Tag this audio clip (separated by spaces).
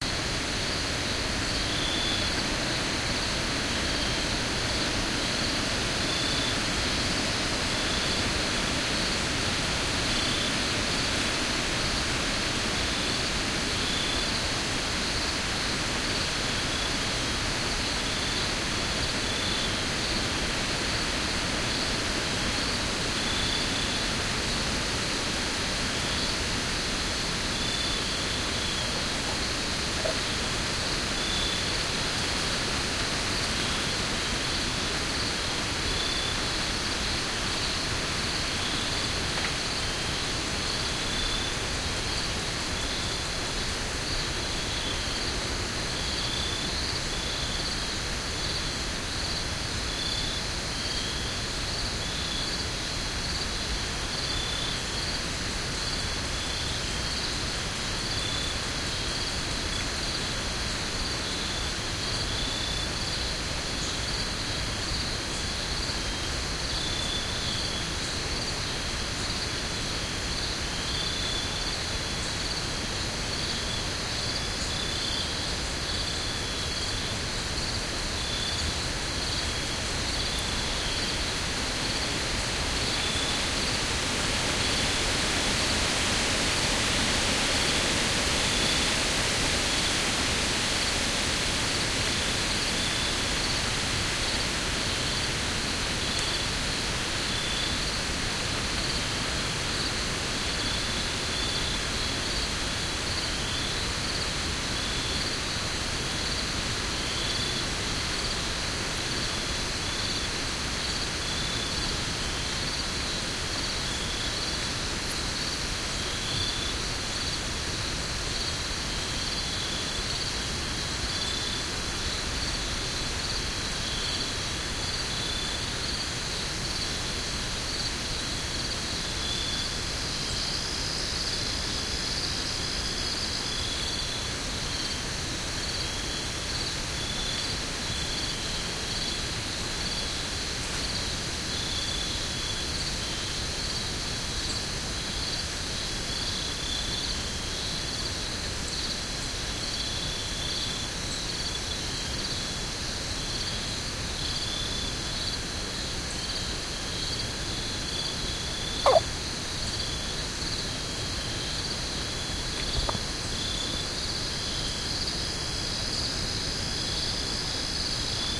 ambiance crickets field-recording nature unedited west-virginia wind